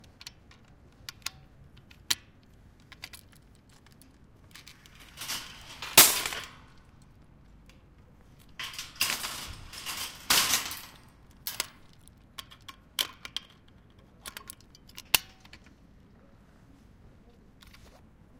taking and parking a shopping cart
Outside a mall at night.
Recorded with Zoom H2. Edited with Audacity.
metal, iron, buy, clash, shopping, store, parking, trolley